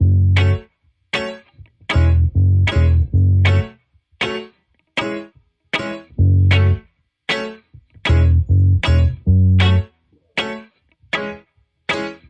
Modern Roots Reggae 13 078 Gbmin Samples